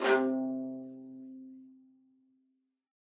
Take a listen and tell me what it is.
One-shot from Versilian Studios Chamber Orchestra 2: Community Edition sampling project.
Instrument family: Strings
Instrument: Viola Section
Articulation: spiccato
Note: C3
Midi note: 48
Midi velocity (center): 95
Microphone: 2x Rode NT1-A spaced pair, sE2200aII close
Performer: Brendan Klippel, Jenny Frantz, Dan Lay, Gerson Martinez
c3, midi-note-48, midi-velocity-95, multisample, single-note, spiccato, strings, viola, viola-section, vsco-2